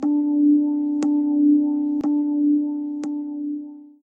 ABIDAR Dina 2022 son3
For my third sound I wanted to create a clock sound.
To create this effect I added in markers the notes RE MI MI RE.
I then used a duplicate echo to intensify the city clock, I added the Wahwah effect and then I finished on a fade out.
tick, clock